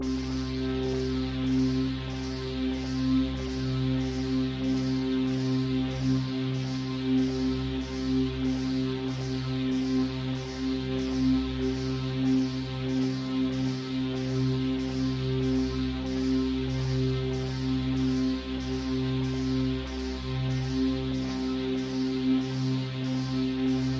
80bpmloops8bars15arp
Synthloop 80bpm, experimental, strange, uncut and analouge.
Synth, 80BPM, Arpegio, Loop